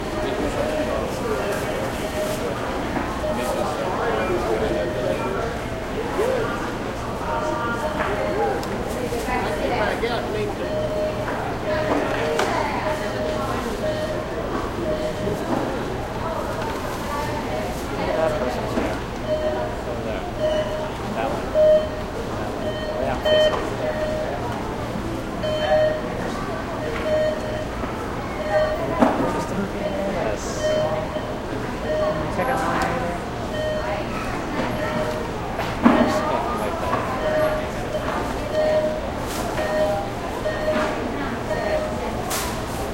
Ben Shewmaker - Walmart Ambience
Ambience in a busy walmart.